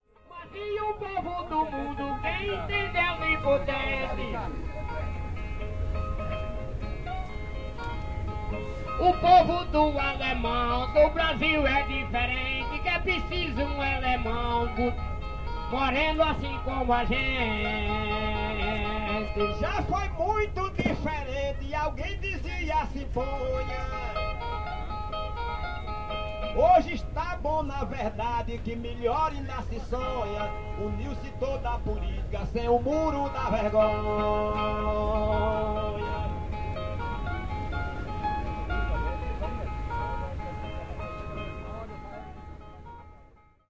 Lower part of the Old town of Salvador, Brazil, near the "mercado modelo", street musicians, so-called "repentistas",
singing improvised ironical songs about the people around, politics and
other interesting topics, playing on a very simple electric steelback
guitar.Dat-recorder, unprocessed, just fading in and out.